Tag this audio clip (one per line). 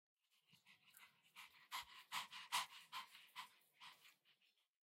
animal
pets
chihuahua
breathing